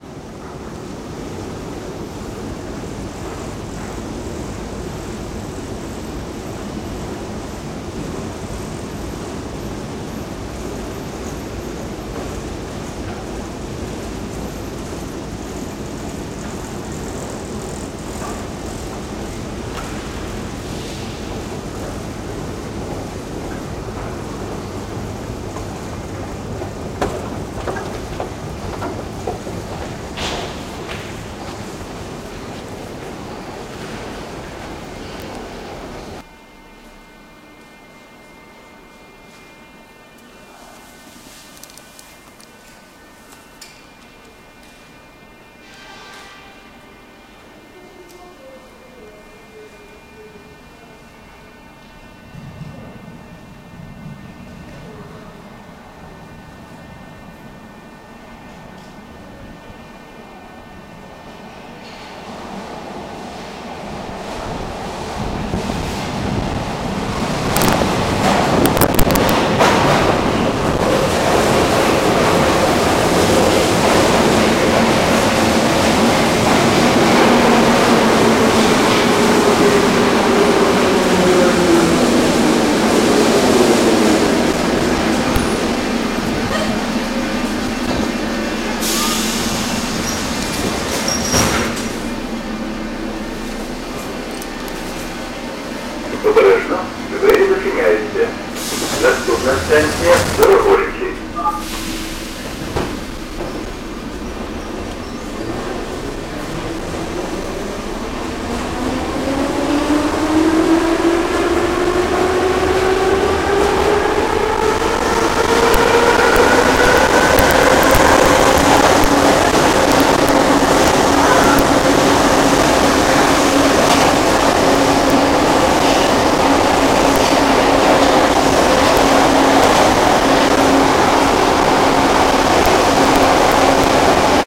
Record in the Kiev metro: descent on escalator and waiting for train (station "Syrec'ka").